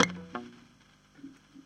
Noise on the guitar track just before recording.
I copied this noise before cut it on the track.
Stack: Stratocaster with Seymour Duncan humbucker sensor -> M-Audio FastTrack Ultra 8R -> Digital recorder.
Mono